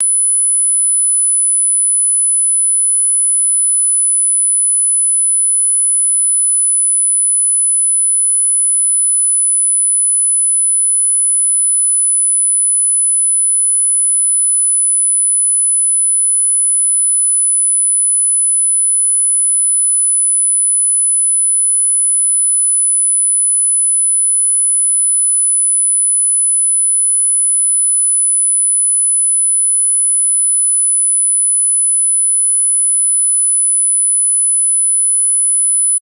tinnitus, acufeno pro
tinnitus clásico, post explosión, oido. 10khz
tinnitus, piiiiii, acufeno, oido, 10khz, sordera, tinitus